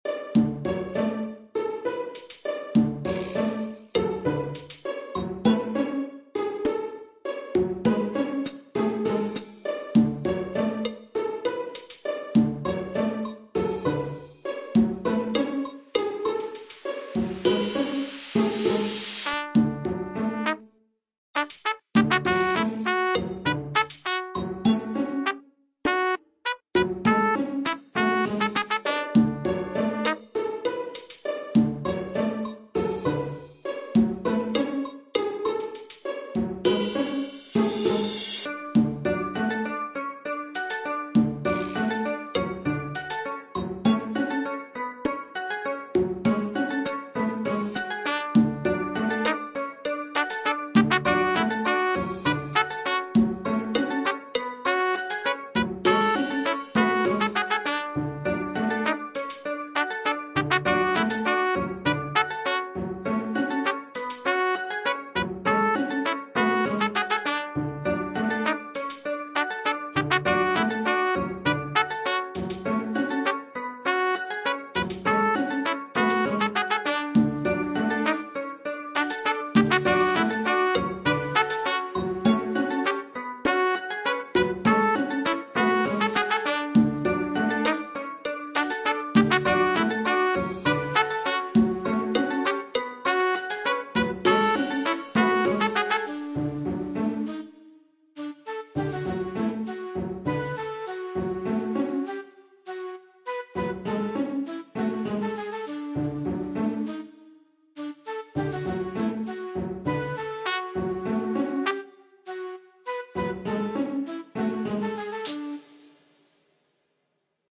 Mr. Beacon
trumpet, gaming